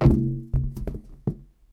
Balloon Weird Noise
Weird Balloon noise - Zoom H2
balloon, pitch-up